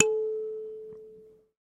SanzAnais 69 A3 fresh
a sanza (or kalimba) multisampled
percussion, kalimba, sanza, african